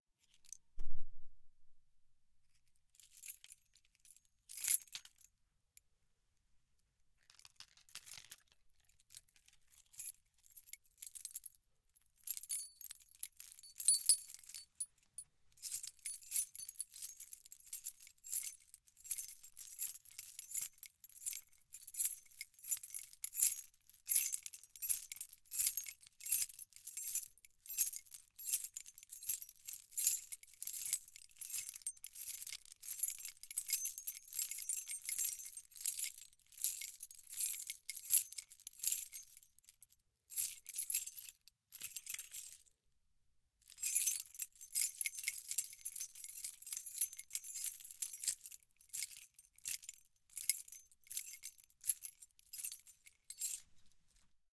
Sound of keys moving around. I used it to emphasize a guy walking.
foley, movement